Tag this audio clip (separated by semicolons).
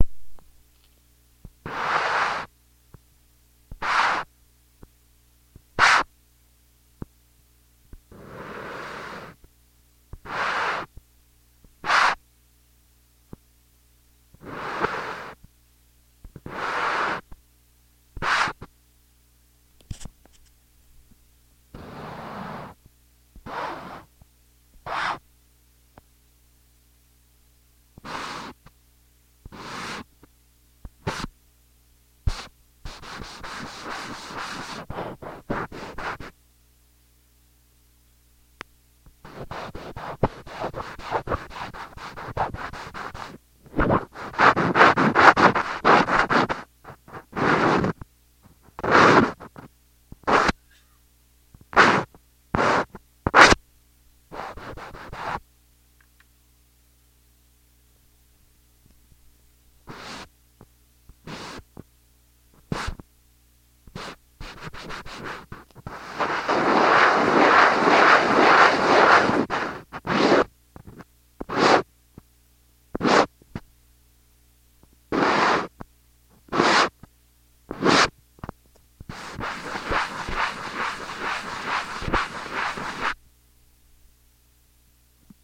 ambient; contact-mic; perception